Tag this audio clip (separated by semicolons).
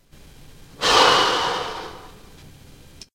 man; deep; vocal; reaction; sigh; breath; male; breathe; human